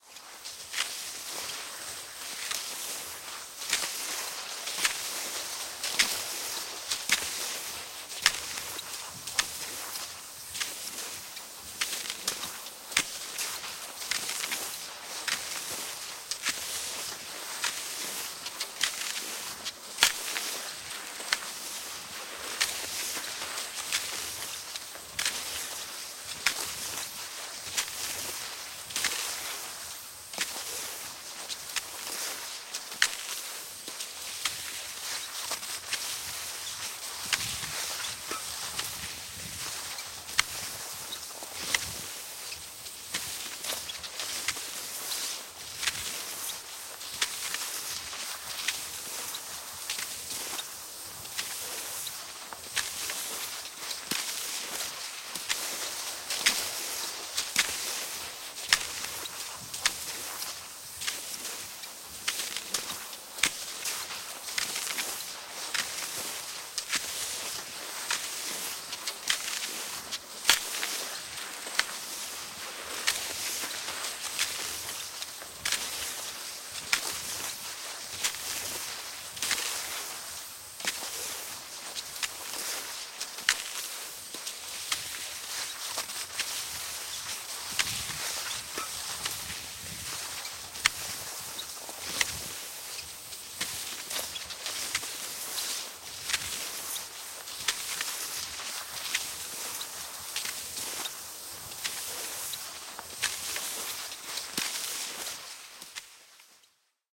Hiihto, sukset, luisteluhiihto / Cross-country skiing, freestyle, fibreglass skis
Hiihtoa luistellen, vapaalla tyylillä, lasikuitusuksilla leudossa pakkasessa.
Paikka/Place: Suomi / Finland / Lohja
Aika/Date: 09.01.1989
Cold, Field-Recording, Finland, Finnish-Broadcasting-Company, Lumi, Pakkanen, Snow, Soundfx, Sports, Suomi, Talvi, Tehosteet, Urheilu, Winter, Yle, Yleisradio